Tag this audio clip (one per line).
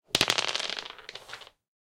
dice
ambient
noise
misc